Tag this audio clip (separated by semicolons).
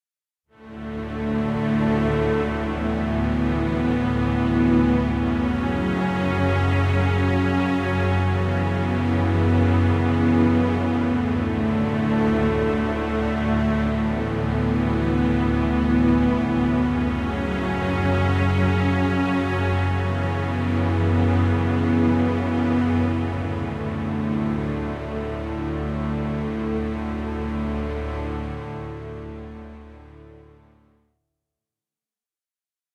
deep; trailer; music; spooky; thrill; hollywood; pad; scary; background; movie; story; drama; film; drone; mood; ambience; ambient; strings; dramatic; atmosphere; horror; dark; background-sound; cinematic